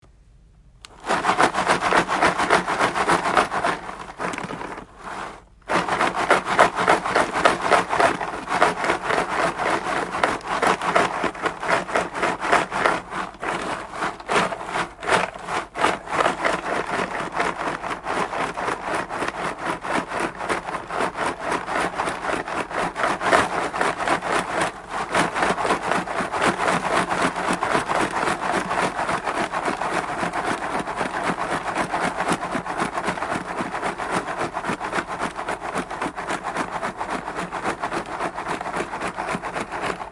Box of Cheez-its